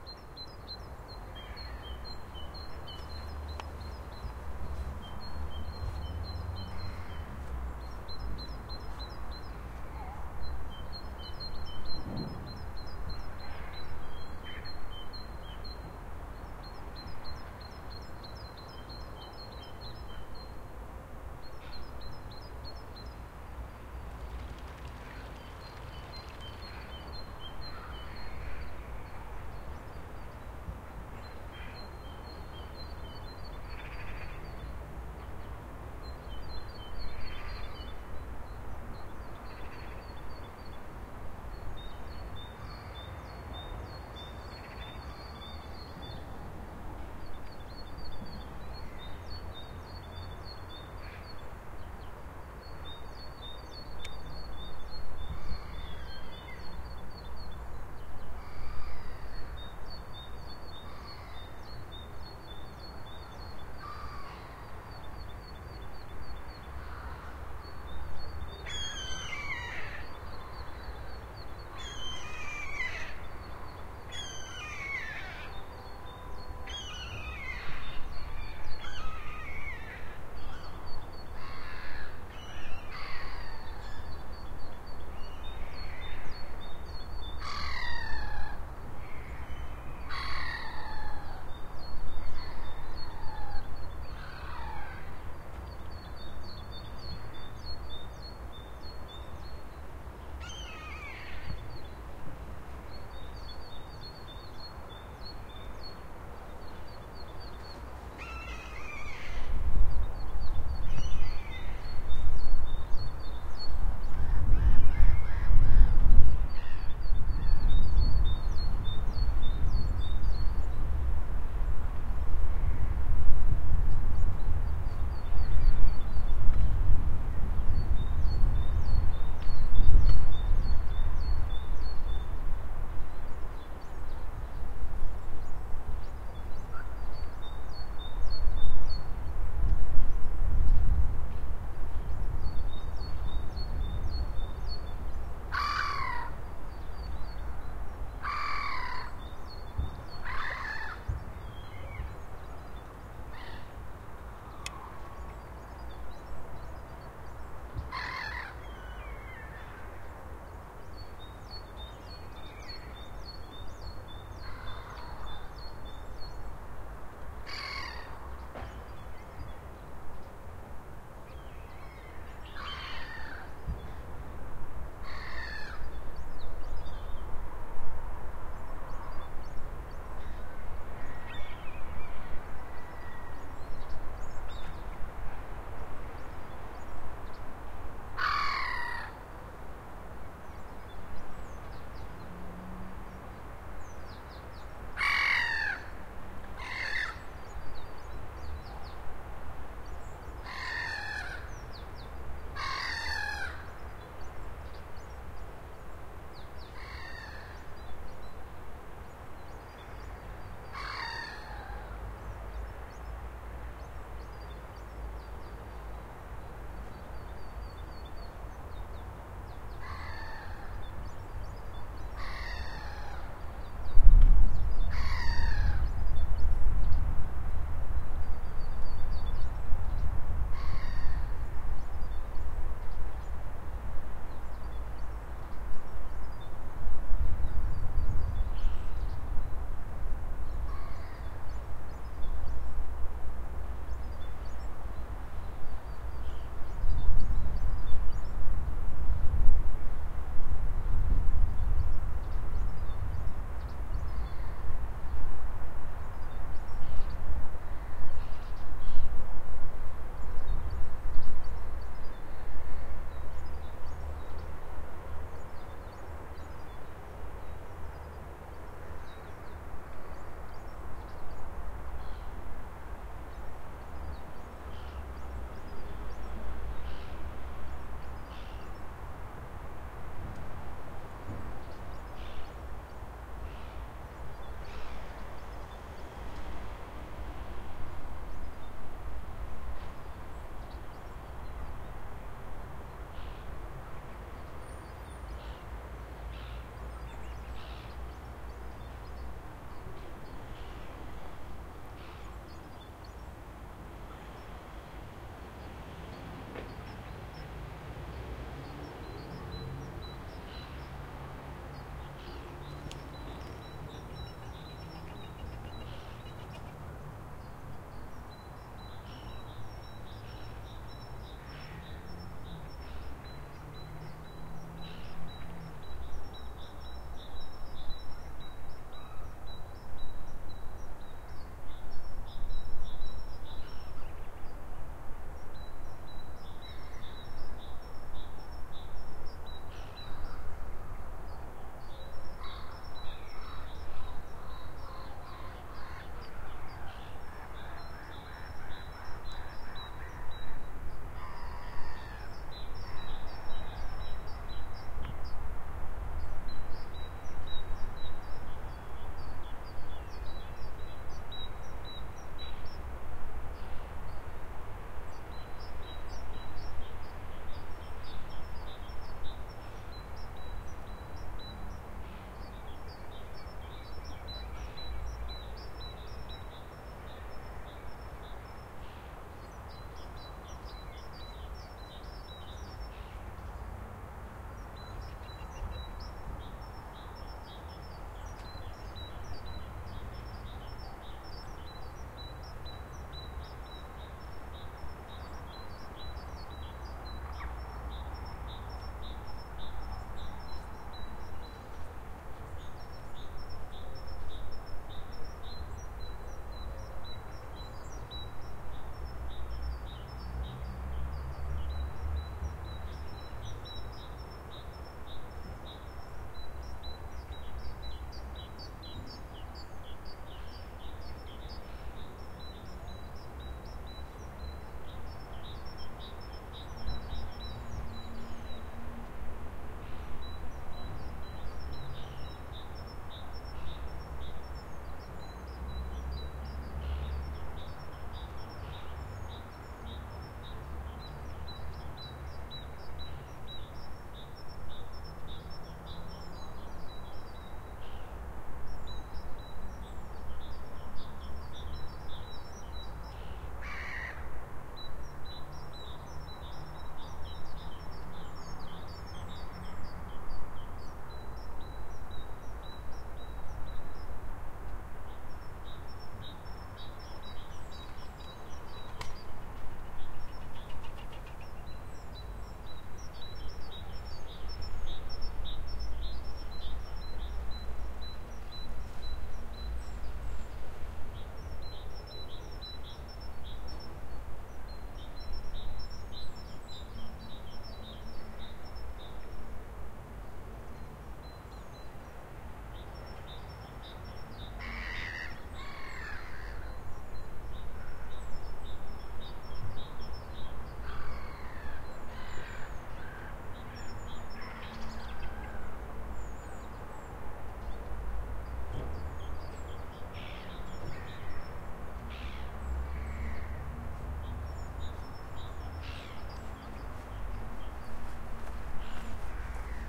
At winter in Odense, Denmark, I placed the field recorder on some branches, pointing towards Odense Å (Odense Stream). The sounds of small birds twittering and singing while seaguls is in for some part. The area is a small urban park by the stream, and some city scape sounds are audible in the background, along with a passing bicycle.